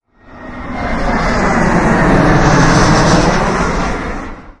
SOROLL D'UN AVIÓ
Noise of an airplane in delta of Llobregat. Recorded with a Zoom H1 recorder.
Deltasona, Llobregat, airplane, airport, el-prat, field-recording, noise, plane